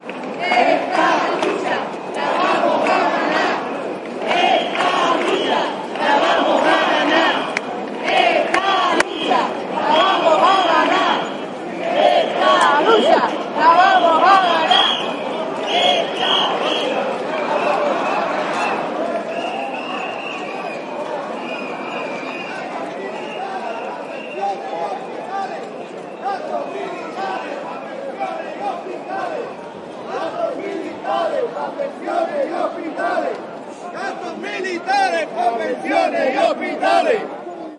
People shouting (in Spanish) during a street protest. Shure WL183 into Fel preamp, PCM M10 recorder